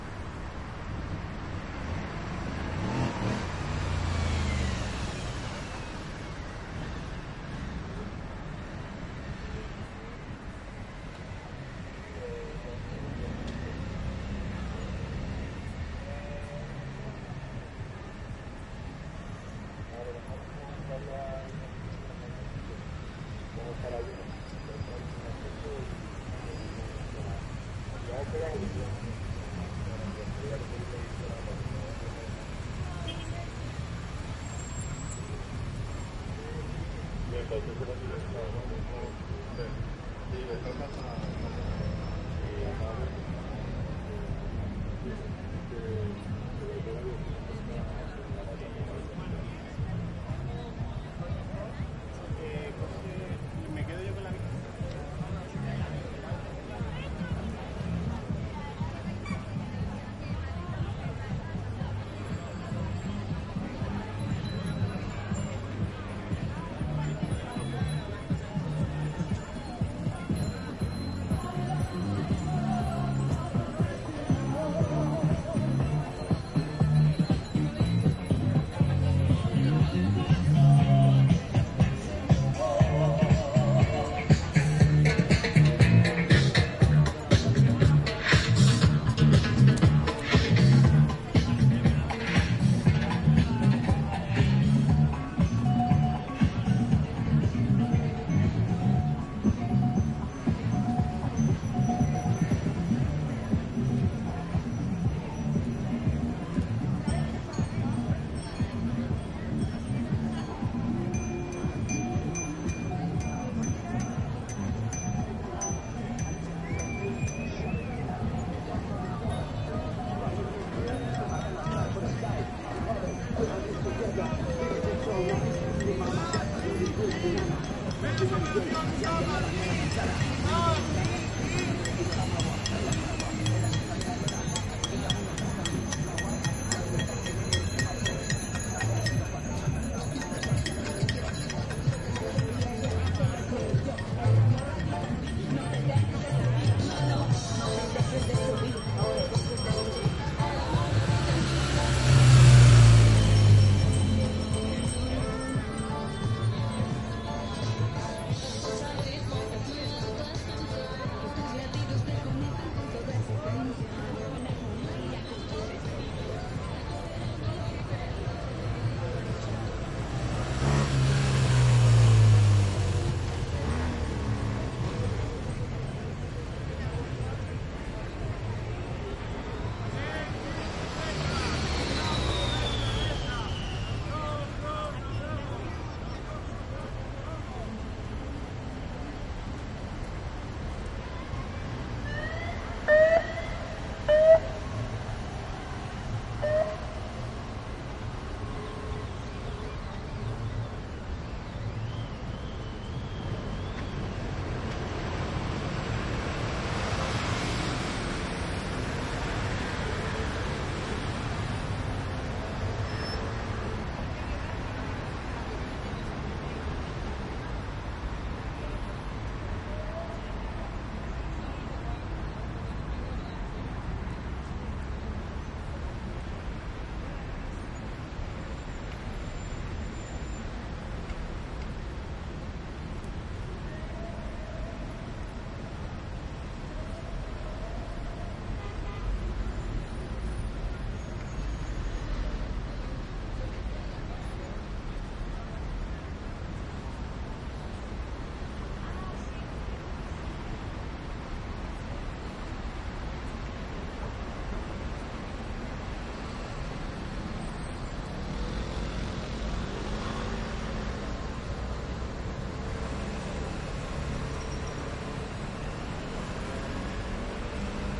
Bicycle demonstration, climate change summit 2019 Madrid, recorded in the Plaza Castilla Bus station, with a Soundfield ST450 MKII microphone in a Sound Devices 744T. B-Format (FuMa) (You need a decoder such as the Surround Zone from Soundfield)
climate-change, b-format, demonstration, 2019, bicycle, Soundfield-ST450-MKII, ambisonic